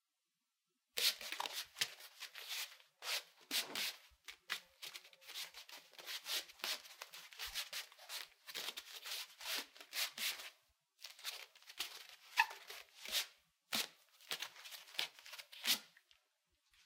plastic-hose-handling
foley, handling, hands, hose, movement, plastic